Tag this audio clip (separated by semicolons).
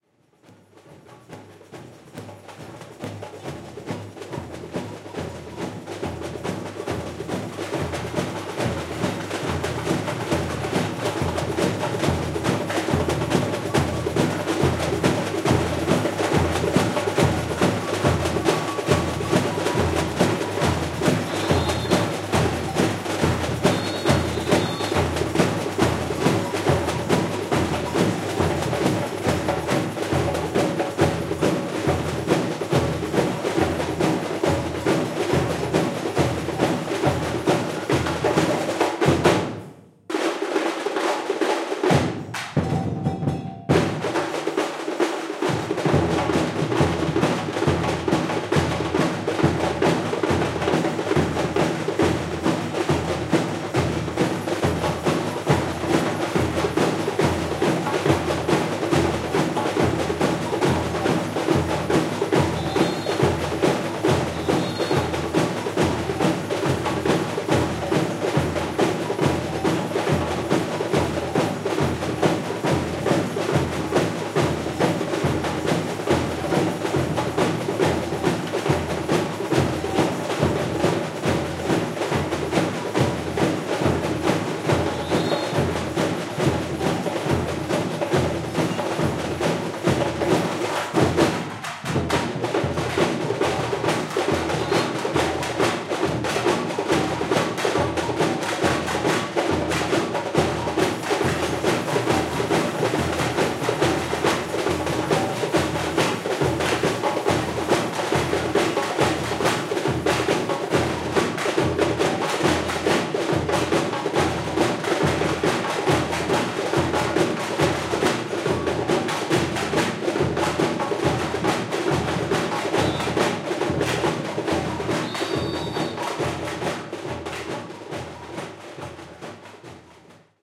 agogo; bateria; batucada; bloco; brazil; caixa; drum; escola; escola-de-samba; percussion; repinique; rio; rio-de-janeiro; samba; samba-school; surdo; tamborim